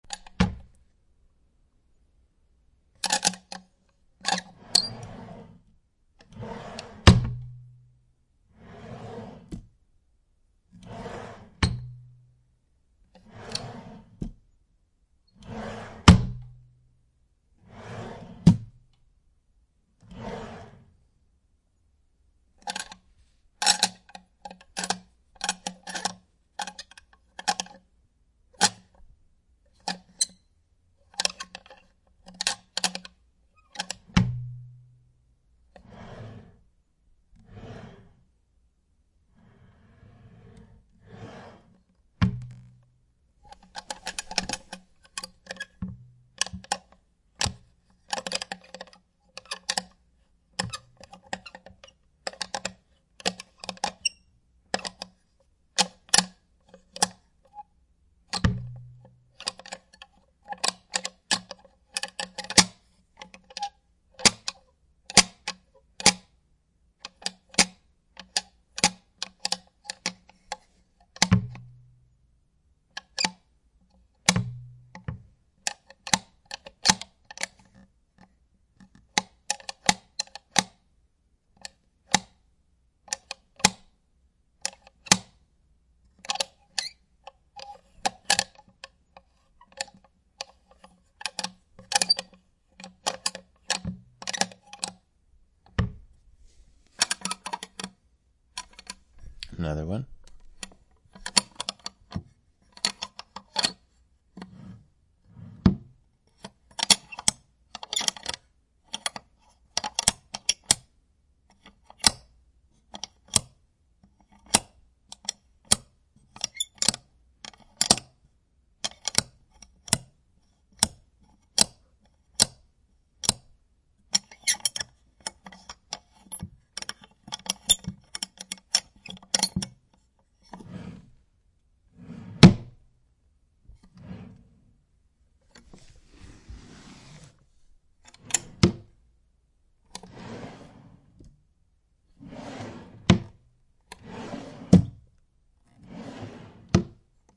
wood drawers open close +door metal knocker
close, door, drawers, knocker, metal, open, wood